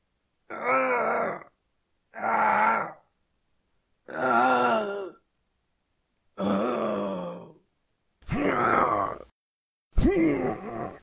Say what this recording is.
Just some grunts i recorded with a cheap microphone for our game prototype.